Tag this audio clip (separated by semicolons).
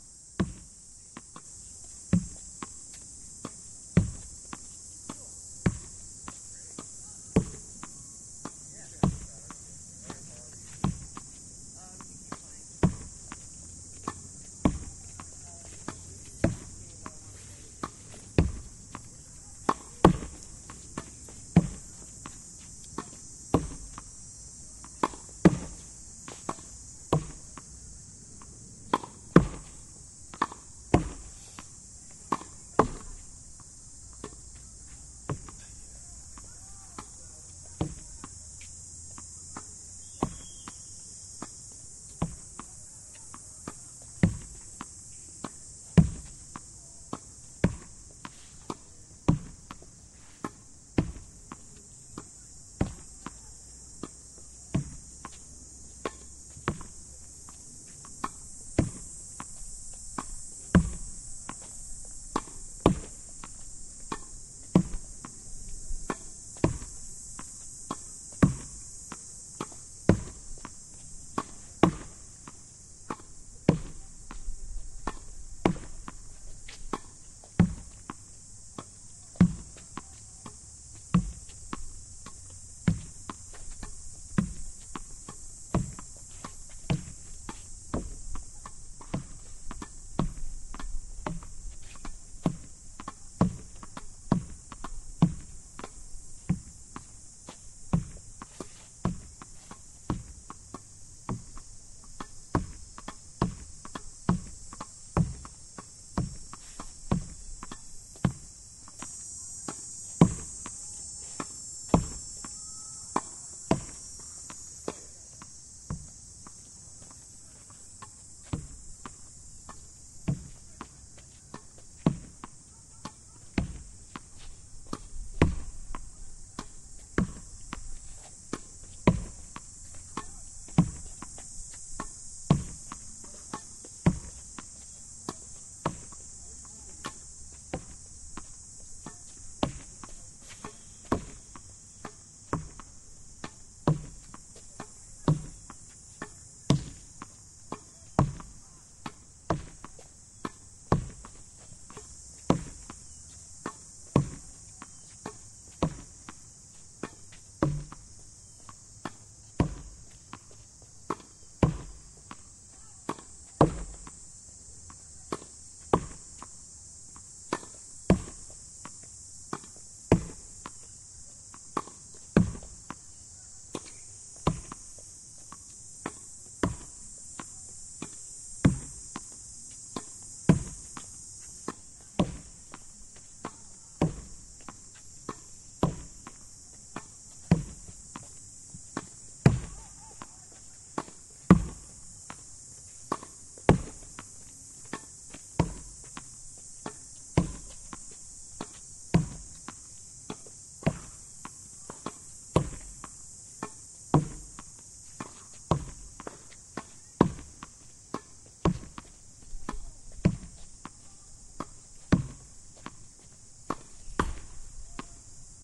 tennis; racquet; ball; racket; field-recording